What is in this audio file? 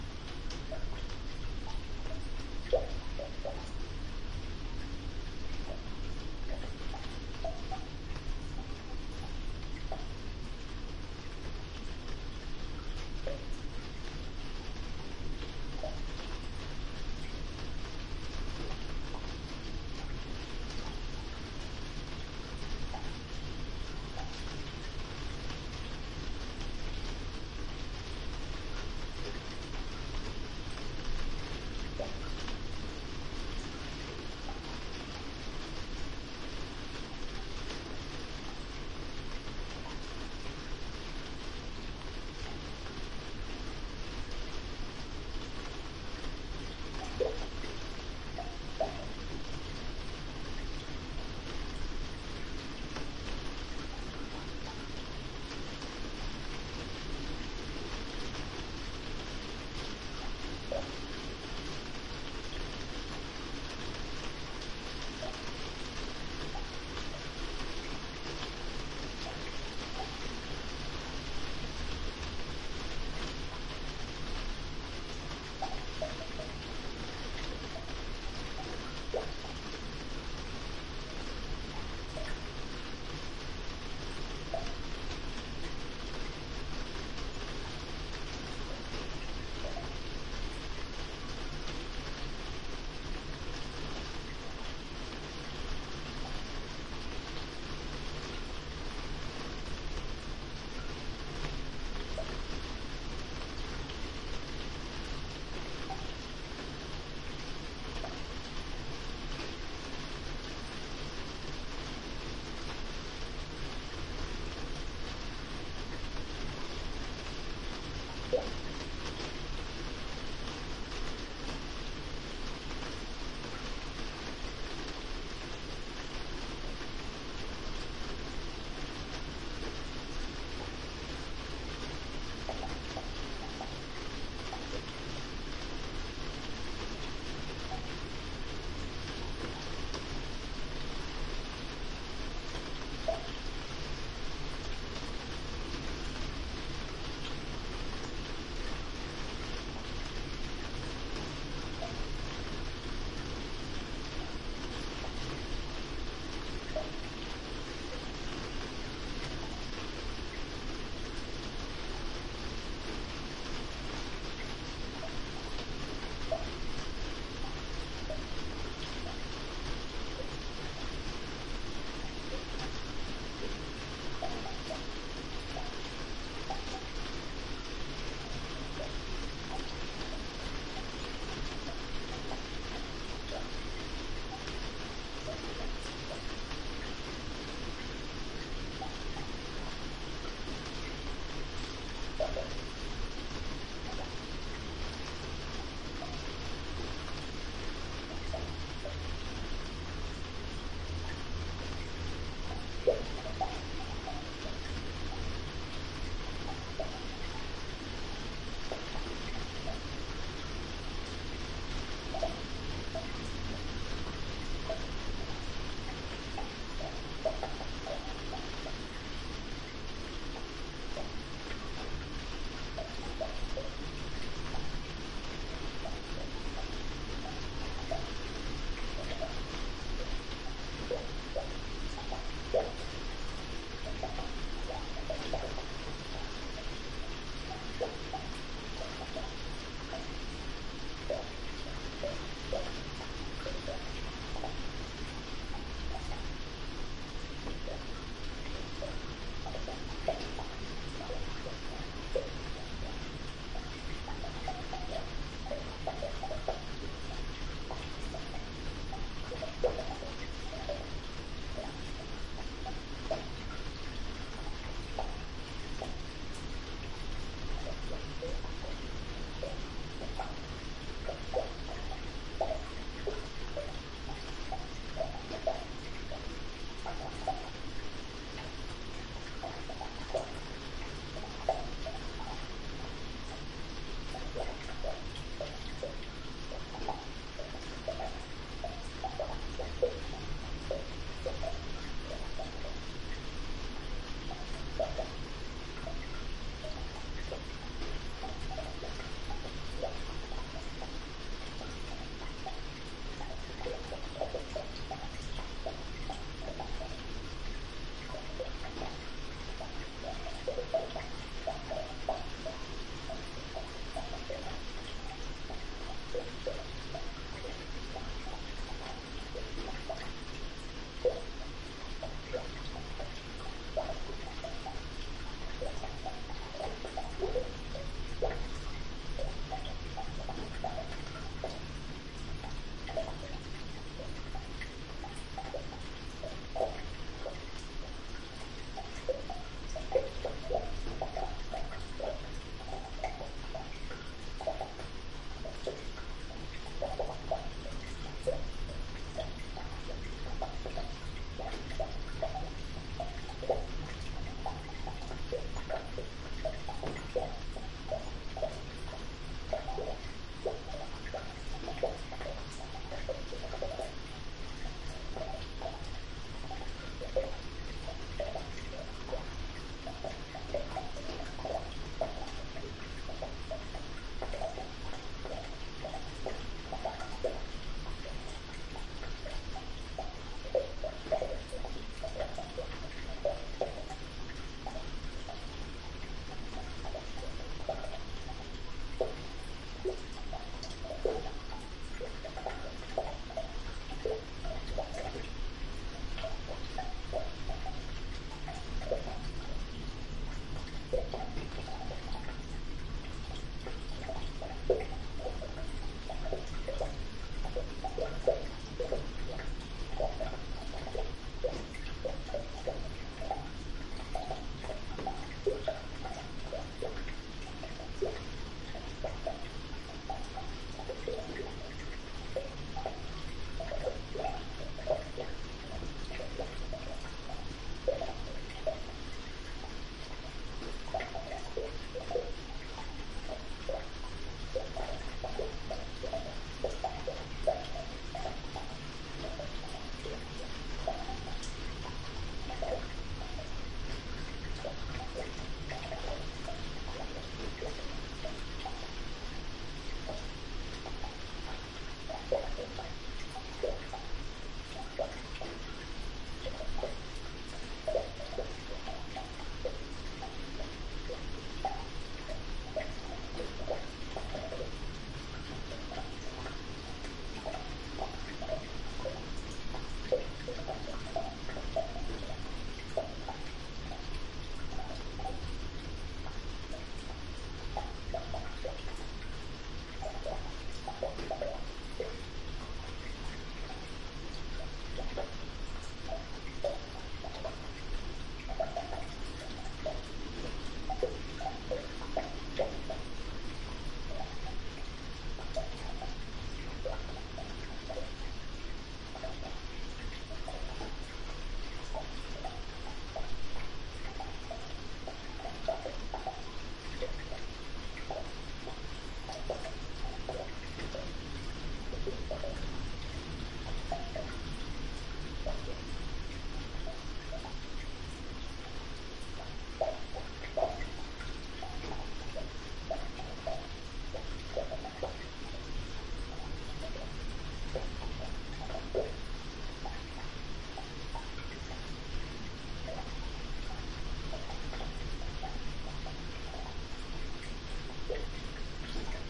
Rain on polycarbonate 2
Sounds to pee to in 3D, featuring The Drain(tm)
I left the dummy-head to record in the conservatory, in the early hours of 28/10/2013, while I slept, expecting a storm. I only got some lovely drain sounds, and some rain, blustery wind and a few window rattles..
Recorded in a long lean-to conservatory. 40mm Multiwall polycarbonate roof, single glazed windows to front, Mic left end facing front.
Elaborate drain-pipe system on left side going down to diverter in greenhouse, front, then along gulley just outside-left and then in to floor-level drain (back,left). 'Plops' left/back/down. 'Trickling' from gutter and drainpipes to the front and left. Rain on roof diffuse, mainly up and right.
Best downloaded, and played using headphones.
Great reference recording to test headphones for their binaural imaging capabilities, to see if they do up/down, front/back, and distance resolution, as well as the usual right/left/spaciousness thingies.
binaural-headphone-test, diffuse-sound-object, drainpipe, drips, dummy-head, headphones